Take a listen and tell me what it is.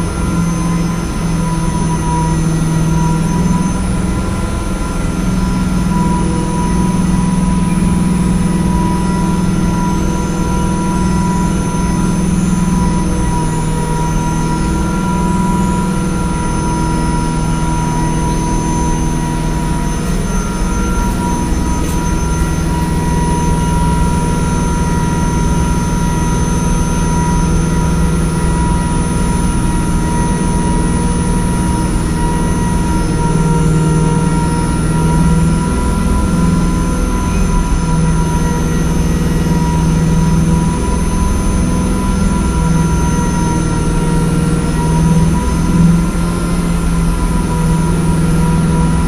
Mechanical hum recorded inside an industrial garage in Brooklyn. Recorded with an iPhone.